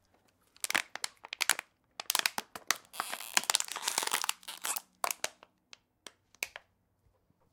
Chugging Water
Bottle, Chugging, Water